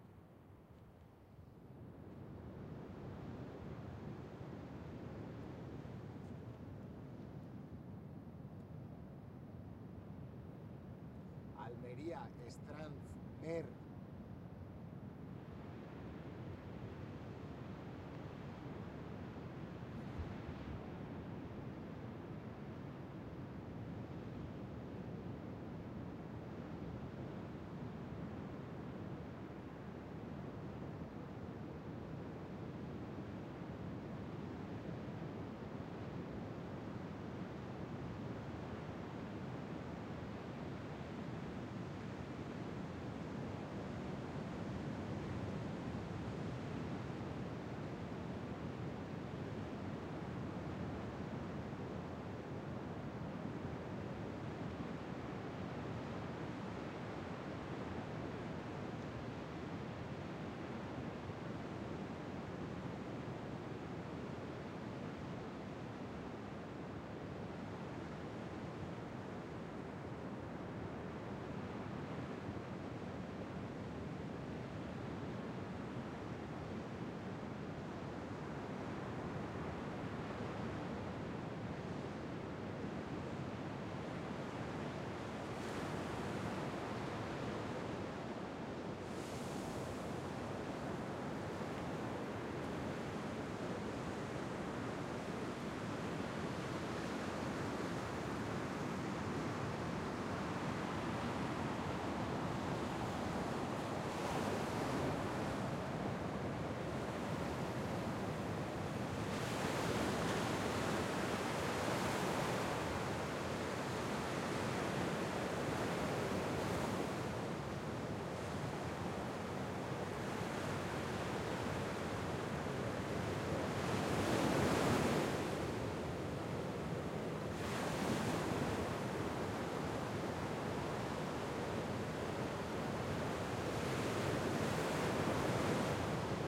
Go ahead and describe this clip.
Walking to the beach in Los Genoveses, Schoeps MS mic, Sound Device
wind, water, MS, coast, almeria, beach, field-recording, sea, waves, shore, seaside